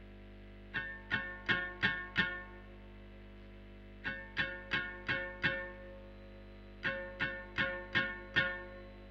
Playing the tunner of Electric Guitar
I just played the strings of the tunner part of the guitar.
Electric, guitar, tunner